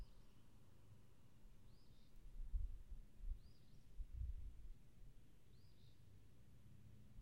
Recording of background sound in a forest.